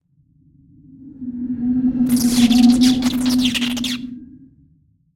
Part of a series of portal sound effects created for a radio theater fantasy series. This one is a straight-forward mechanism sound with some "sparks".